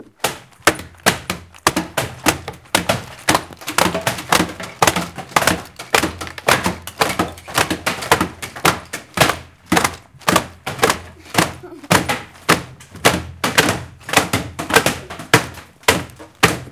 Ecole Olivier Métra, Paris. Field recordings made within the school grounds. Pupils tap the tables with their pencil cases.